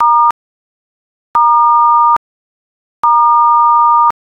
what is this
The '*' Star key on a telephone keypad.
button, dial, dtmf, key, keypad, star, telephone, tones